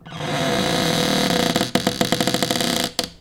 One in a series of some creaks from my cupboard doors. Recorded with an AT4021 mic into a modified Marantz PMD661 and edited with Reason.
open, cupboard, door, kitchen, creak, close, foley